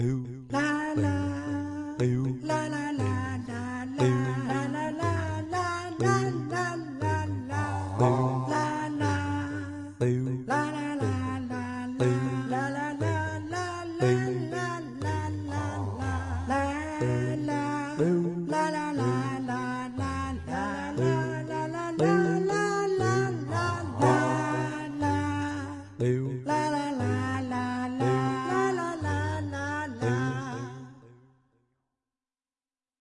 sad and silly vocal tune
Sad and silly all at the same time. May be good for game music, or video music or?
sad, game, video, music